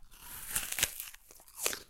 Eating Apple
Taking a bite from an apple.
munching, Apple, bite, eating-apple, fruit